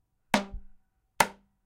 Nagra ARES BB+ & 2 Schoeps CMC 5U 2011
percussion on wooden board, high sound